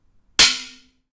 Knife Hit Pan Filled With Water 3
hit, impact, knife, metal, pan, pong, struck, water